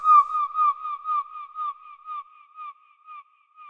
reinsamba Nightingale song seagull1-indub-rwrk
reinsamba made. the birdsong was slowdown, sliced, edited, reverbered and processed with and a soft touch of tape delay.
spring, peace, natural, happy, soundesign, seagull, delay, summer, ambient, bird, echo, effect, funny, tape, electronic, dub, reverb, score, reggae, nightingale, flying, fx, space, birdsong, animal